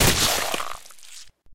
Created for a video game I'm developing with a bunch of friends. Perfect for a headshot in a shooter video game or when an enemy's head/body is reduced to a bloody pulp by a powerful attack. Works just as well when you combine with another sound(like an explosion, rifle shot, etc.) Could work well for a action film as well!
Made using a lot of sounds I collected from a lot of different sources. Produced with Ableton Live 9.
exploding-flesh, gore-splatter